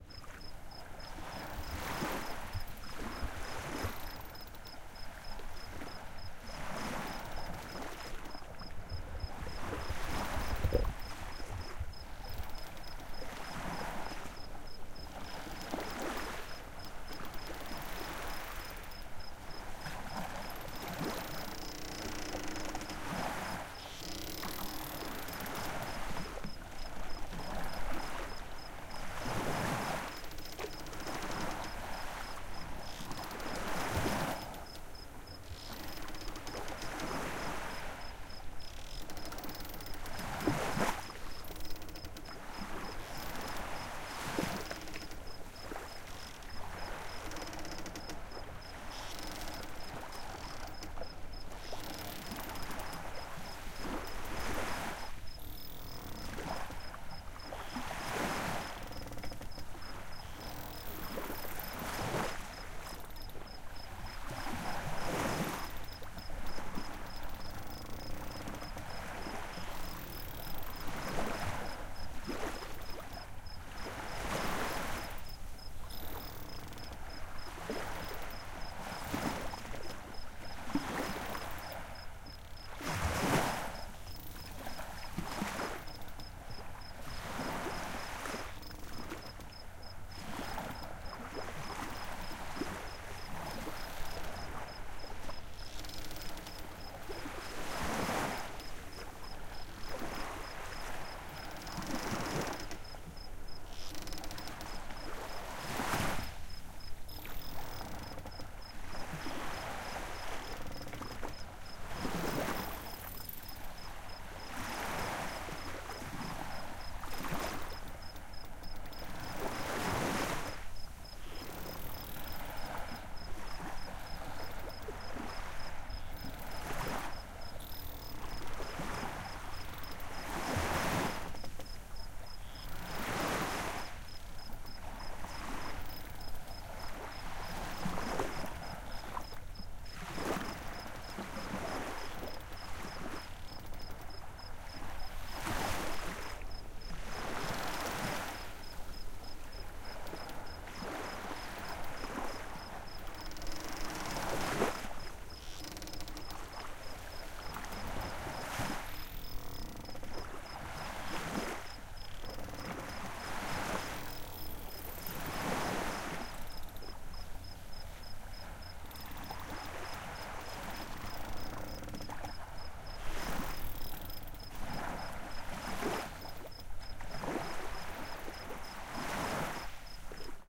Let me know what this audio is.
HARBOR AT NIGHT AMBIENCE
Night harbor atmosphere, sound of the sea, boats and crickets.
PUERTO DE NOCHE
Ambiente nocturno de puerto, sonido del mar, barcos y grillos.